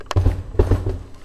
mono field recording made using a homemade mic
in a machine shop of a hammer hitting metal
metallic, field-recording, percussion, machine